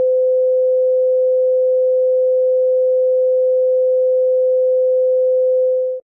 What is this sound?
broadcast stopping
broadcast, TV, television, Japan, Japanese, end